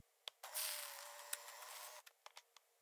sl50 zoom in
Samsung SL50 zooming in (motor noise)
zoom, sl50